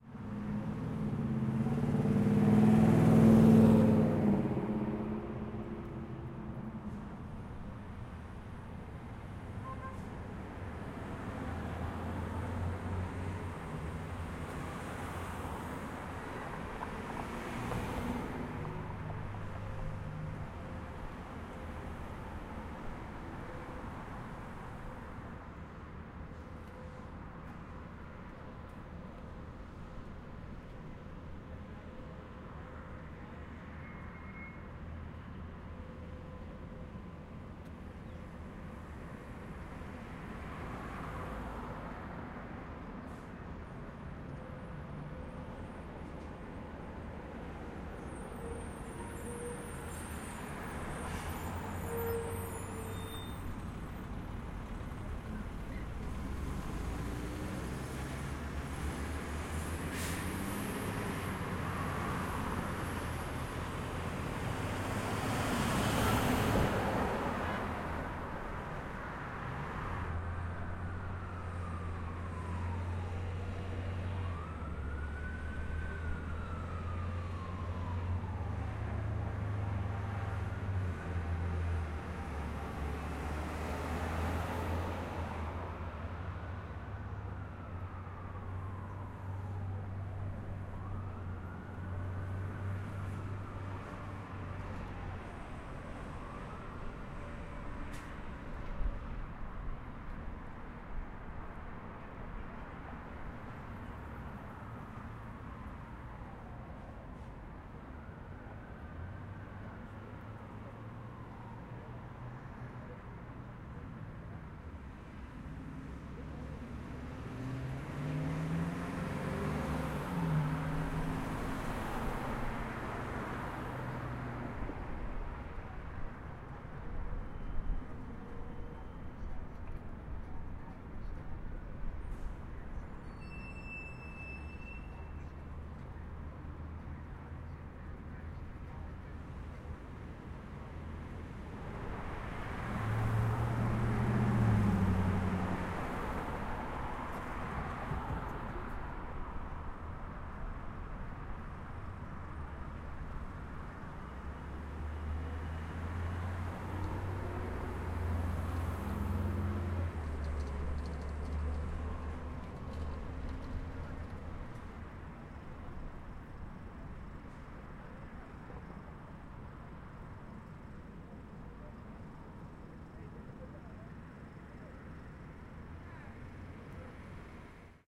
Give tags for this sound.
AudioDramaHub City field-recording Los-Angeles Street traffic

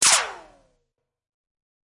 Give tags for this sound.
laser fire clip handgun audio

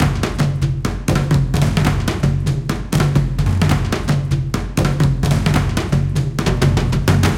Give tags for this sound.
african loop percussion

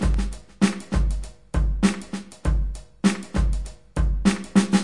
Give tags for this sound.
99-bpm beat blues drum-loop funky groovy loop percussion-loop rhythm

